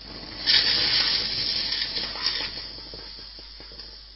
Water on sauna heater 5
Water on sauna heater
cracking, heater, Sauna, sissling, water